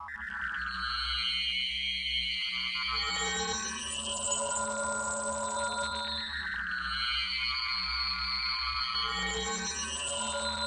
awesome synth fart i made with granuizing a bass sound
bass, grain, granulated, granulized, fart, synth